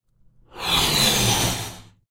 a bull blowing up